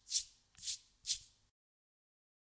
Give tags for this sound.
Game Spear Trap

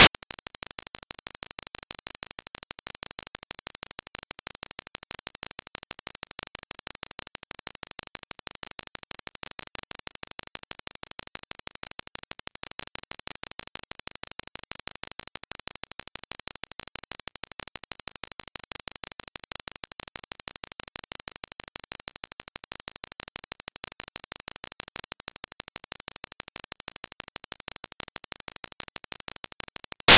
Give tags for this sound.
audacity beep data raw unsure

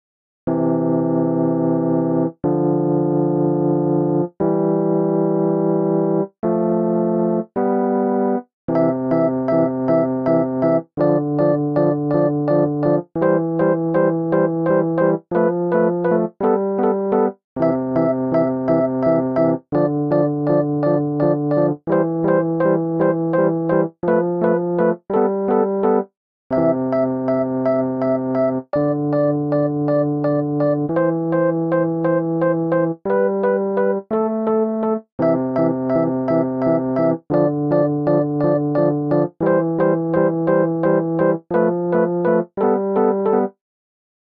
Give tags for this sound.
Game-Theme Game-Music Loop Music Bit-Game Melody

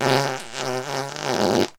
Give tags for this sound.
blowing passing razz Geschwindigkeit hastighed velocidad snelheid intestinal wind brzina gas raspberry Fart ferzan flatulence vitesse

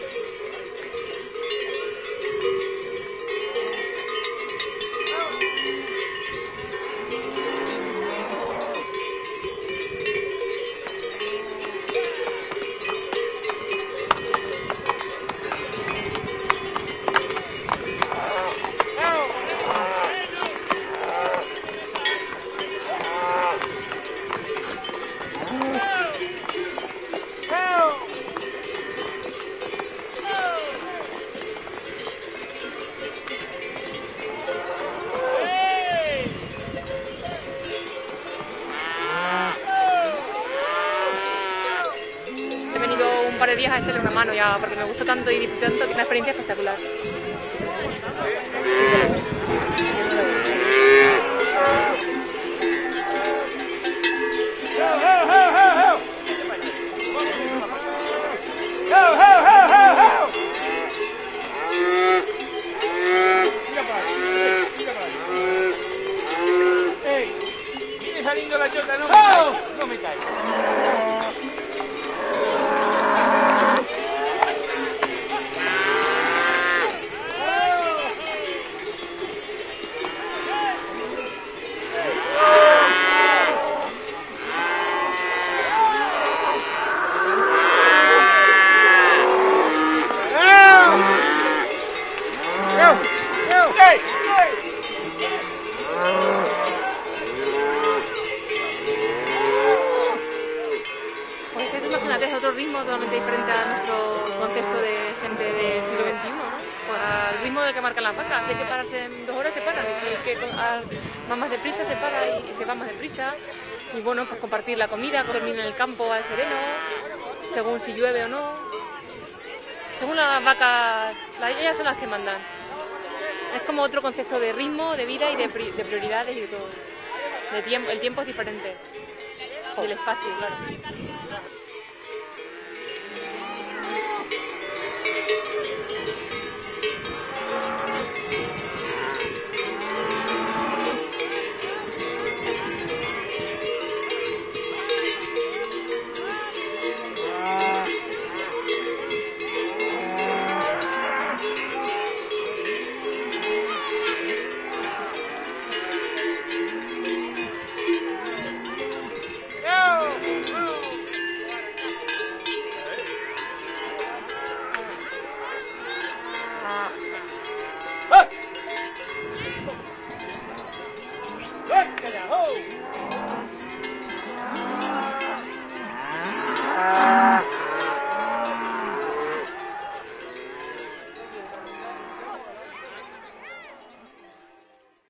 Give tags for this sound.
animales animals cattle-raising cowbells cows ganaderia lore mooing oficios Puerto-del-Pico Spain tradiciones

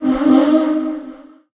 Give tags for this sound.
voice fx

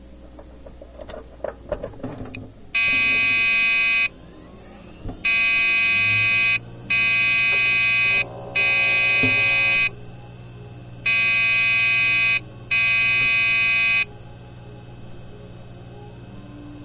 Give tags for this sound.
angry noise speaker dell

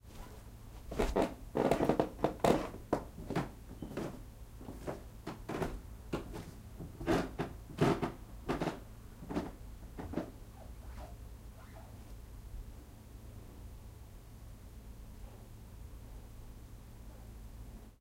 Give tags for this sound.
away down

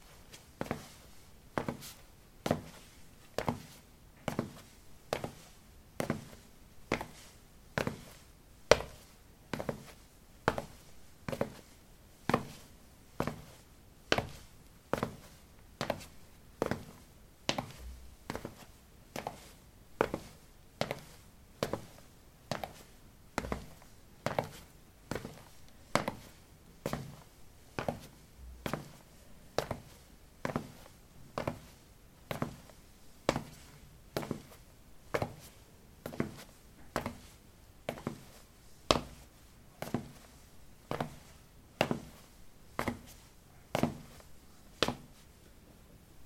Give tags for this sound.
footsteps footstep steps

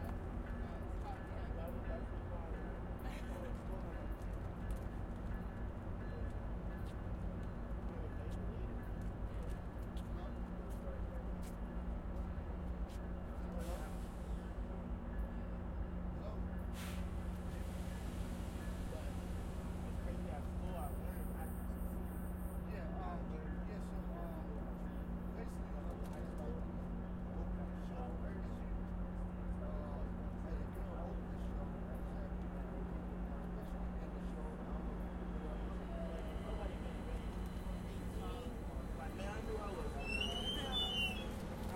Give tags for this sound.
metro Station Field-Recording Train

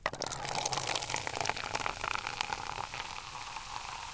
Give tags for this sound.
pouring soda

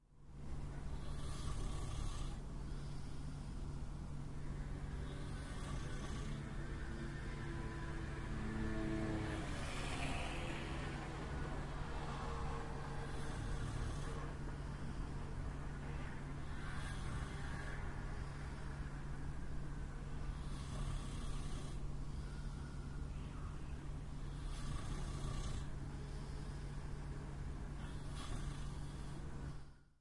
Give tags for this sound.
bed human field-recording traffic breath street engine street-noise

